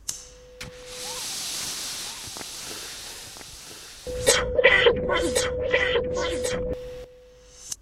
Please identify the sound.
The idea was to mix different pre-recorded sounds and create one representing somebody’s first cigarette.
First, I added a recorded sound of a lighter, then a sound of someone smoking and added the fade in effect between them. Then I used a sound of coughing, pasted it twice, added echo, some reverb, wahwah effect, changed the tempo, decreased the amplification and faded it out. After that, I have generated the sine tone with a 500Hz frequency, decreased the amplification, faded it in and faded it out. Finally, I took the first sound of a lighter and reversed it to close the loop.
Typologie de Pierre Schaeffer : continu complexe X
Analyse morphologique des objets sonores de Pierre Schaeffer :
1. Masse : sons cannelés
2. Timbre harmonique : terne
3. Grain : rugueux
4. Allure : sans vibrato
5. Dynamique : attaque abrupte
6. Profil mélodique : variation scalaire
7. Profil de masse : site, différentes variations et hauteurs